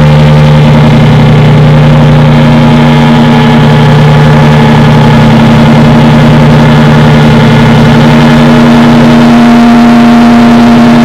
SC DARK ENERGY
Generated with a basic Super Collider script.
COLLIDER, ENERGY, SUPER